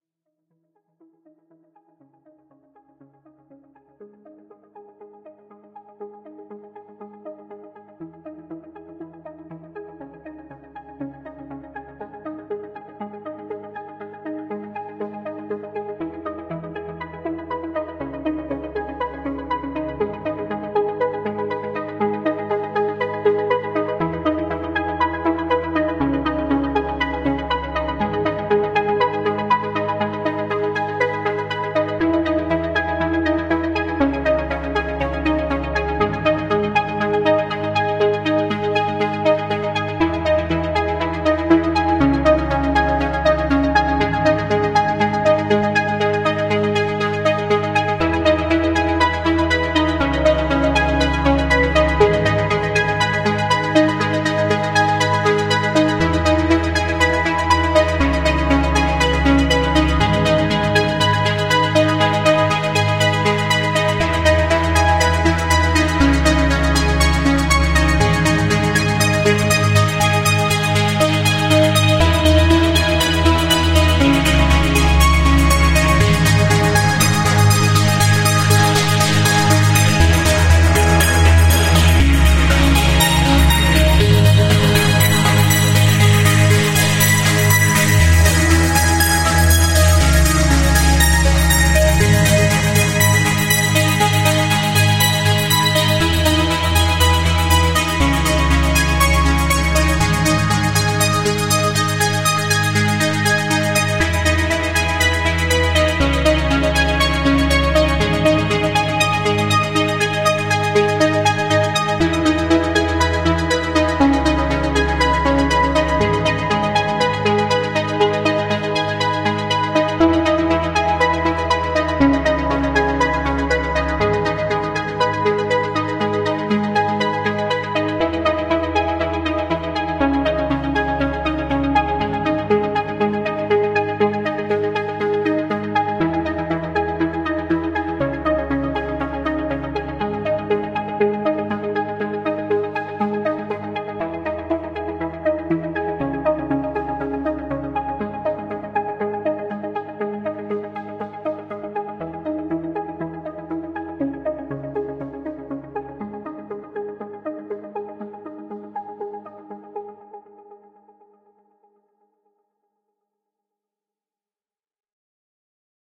Dramatic Uprising Pulse Ambience
Here is a super intense uprising pulsing ambience sound effect. Can be used for a wide variety of scenes for a piercingly intense atmosphere.
intense, atmosphere, terrifying, film, uprising, action, suspense, suspence, rise, ambience, sinister, up, drama, creepy, pulse, wild, growl, music, ambiance, dramatic, rising, horror, pulsing, thrill